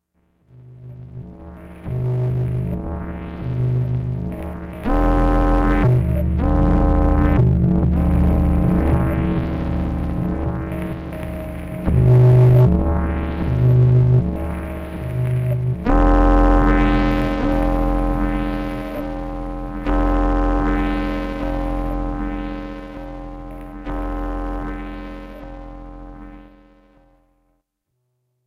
From the series of scifi drones from an Arturia Microbrute, Roland SP-404SX and sometimes a Casio SK-1.